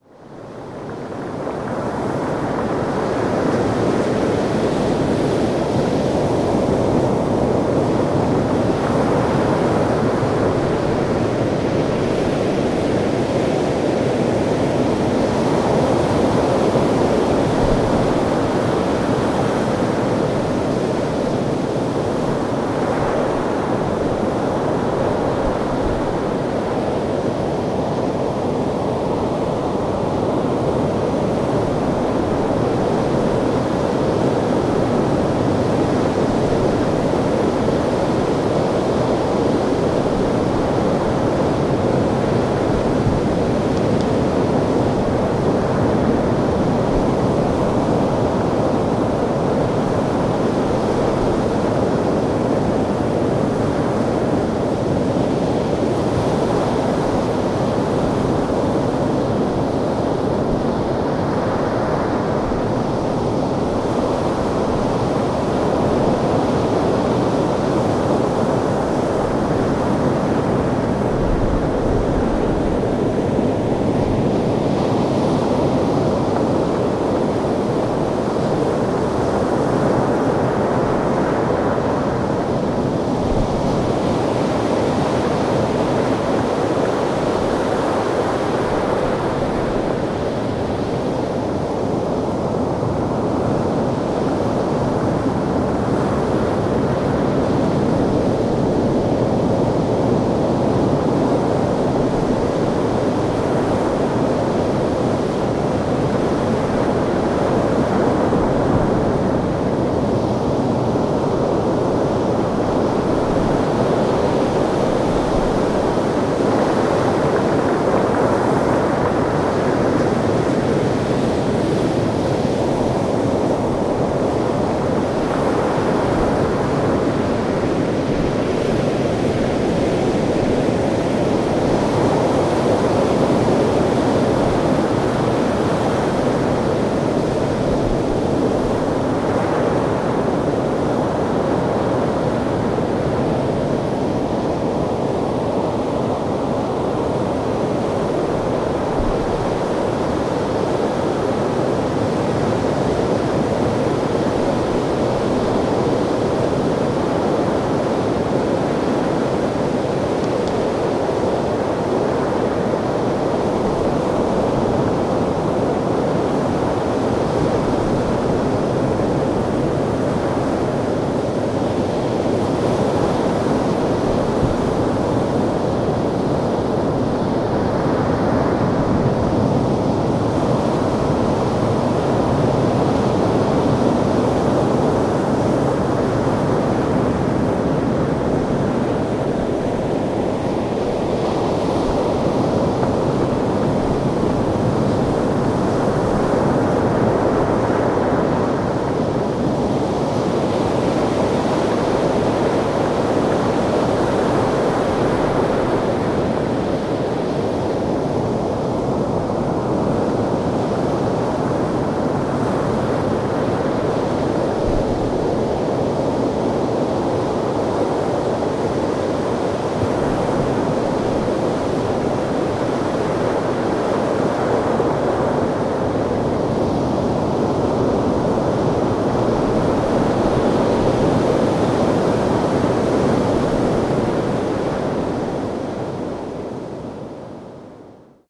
08.Abereiddy-Beach
Recording on a pebble beach in Pembrokshire.
sea, pebble-beach, waves, field-recording